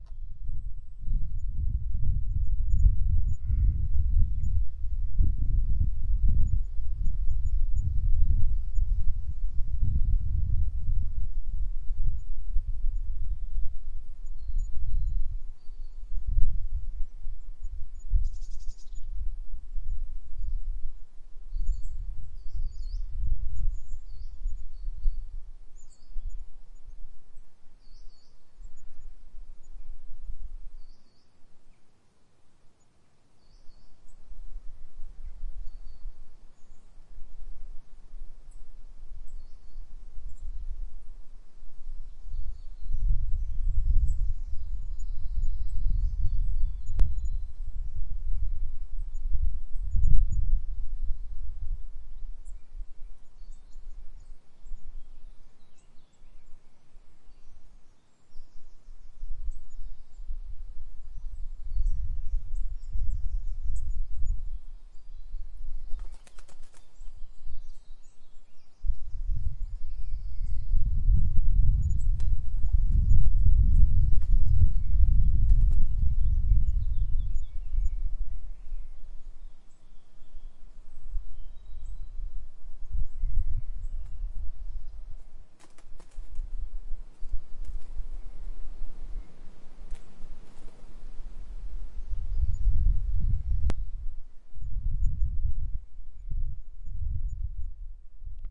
Recorded with a Tascam DR07 Mkii in a secluded woodland in West Wales. Totally natural without any effects.
No one needs lawyers.